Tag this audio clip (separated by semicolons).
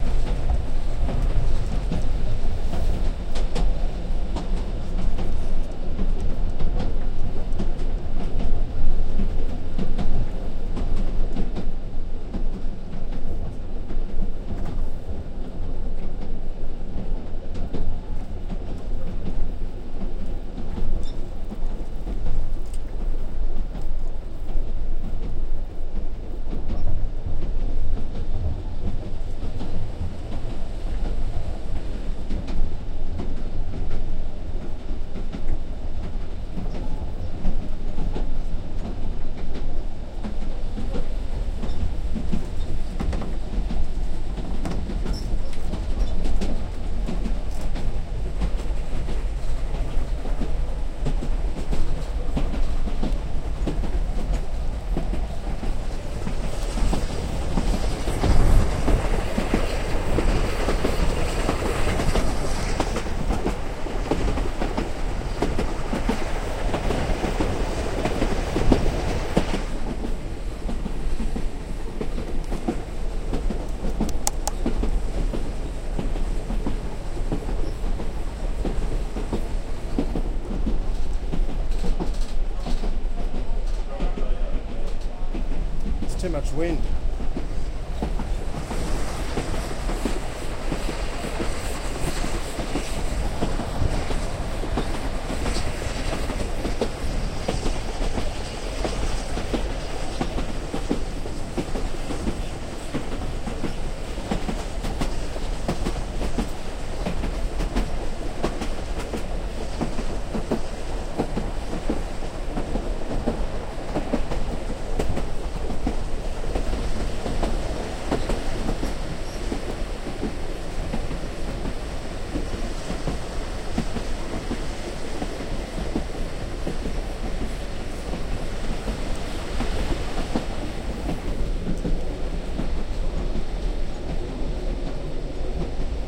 carriage; interior; railway; steam; train; wooden